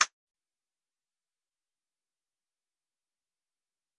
Tonic Electronic Snap
This is an electronic snap sample. It was created using the electronic VST instrument Micro Tonic from Sonic Charge. Ideal for constructing electronic drumloops...